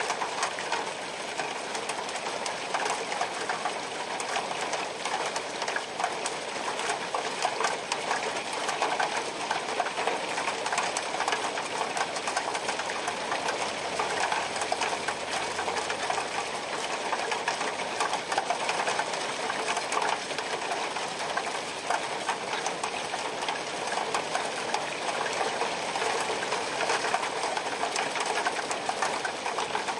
Heavy Rain
ambience, ambient, background-sound, soundscape